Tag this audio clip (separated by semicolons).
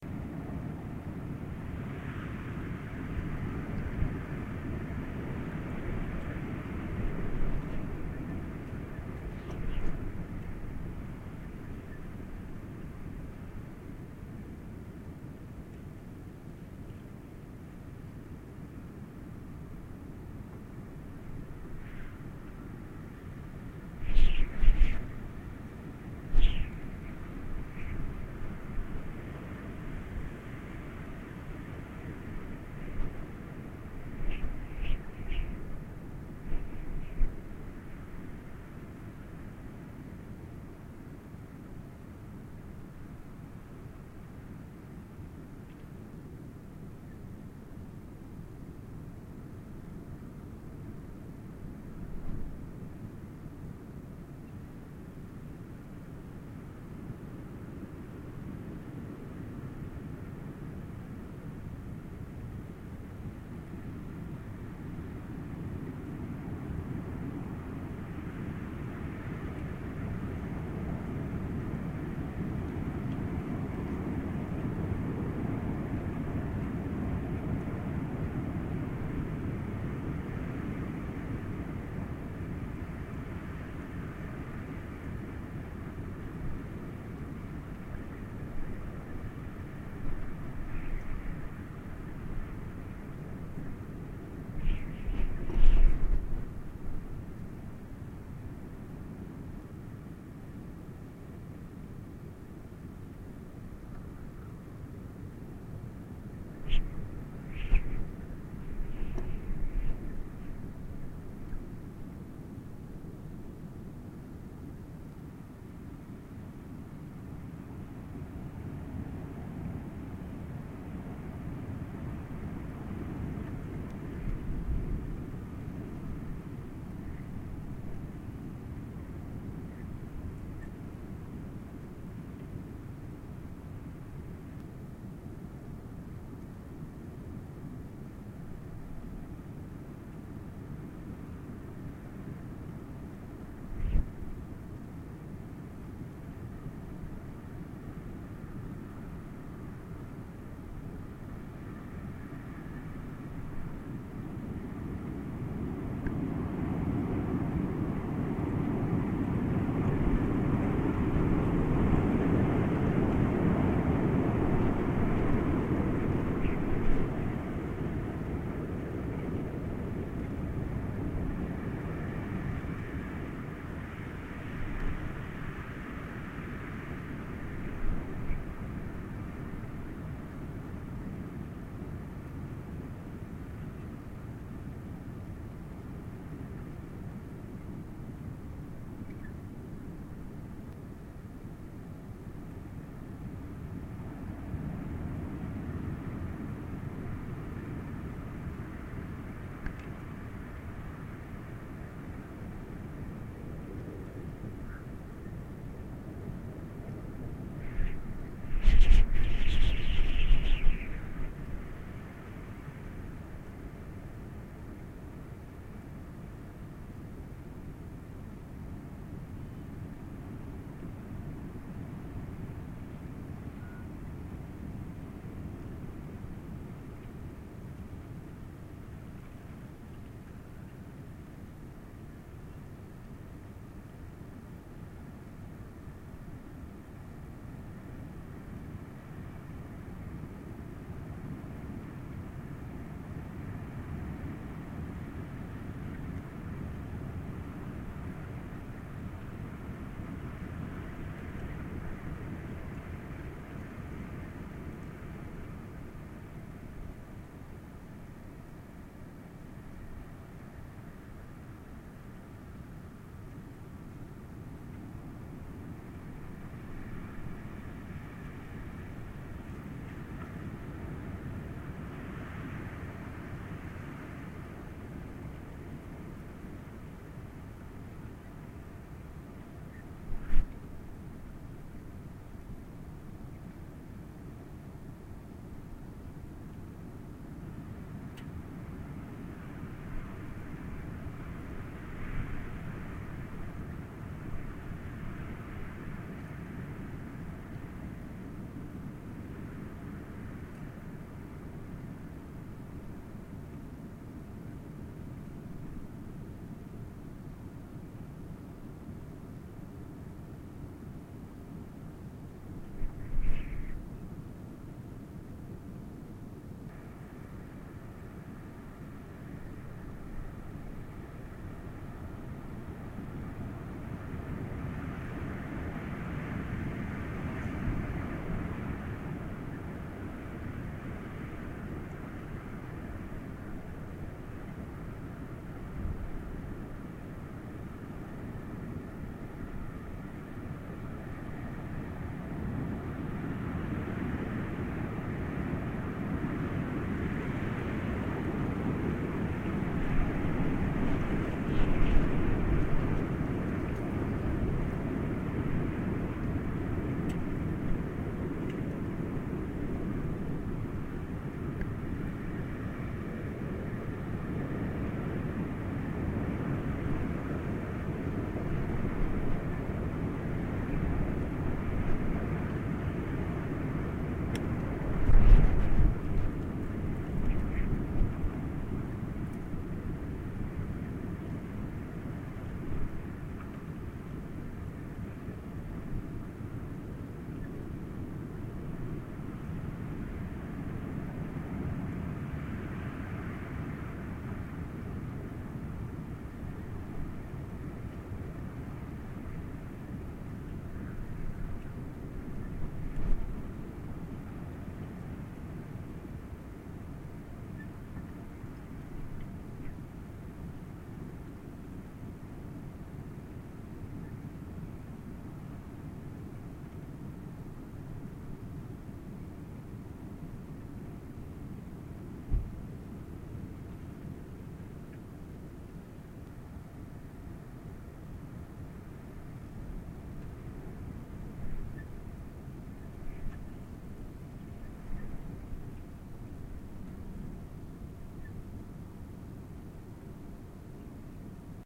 howl; wind; whistle